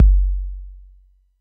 kick long tr-8
tr-808 kick sound of aira tr-8
kick, tr-8, drum-machine, 808